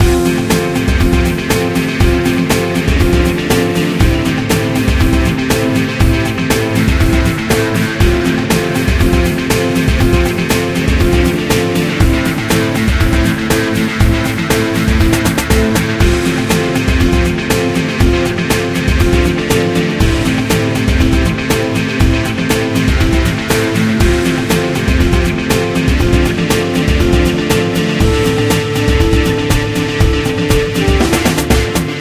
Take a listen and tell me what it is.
A music loop to be used in storydriven and reflective games with puzzle and philosophical elements.